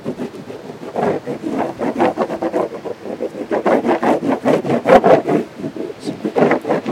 waxing surfboard
Waxing a surfboard